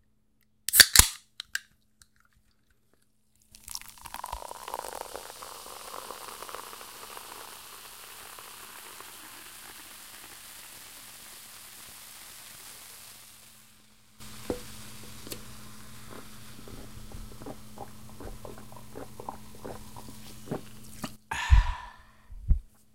Cracking open a can of Diet Coke, pouring into a glass and chugging it down.
Recorded with a TASCAM DR-40